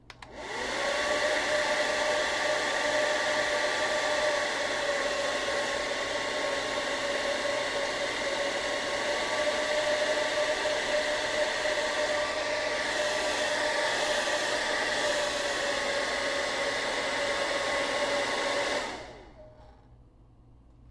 hair-dryer
A hair dryer is turned on and off.
appliances, hair-dryer